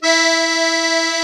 real master accordeon
accordeon, instrument, master